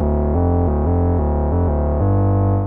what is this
Moog Bass 001
Hard and dark synth bass part recorded from a MicroKorg. May blip at end of sample and require fadeout.